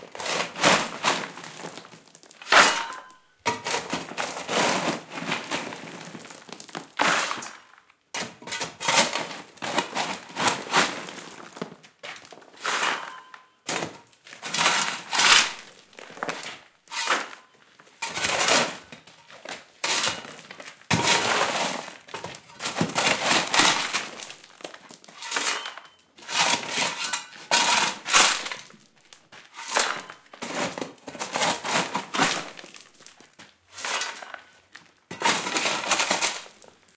Working with shovel
working-with-shovel, shovel, recording, working, field